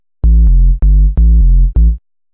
Techno Basslines 014
Made using audacity and Fl Studio 11 / Bassline 128BPM
128BPM, BASS, Basslines, sample, Techno